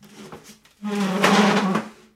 chaise glisse5
dragging a wood chair on a tiled kitchen floor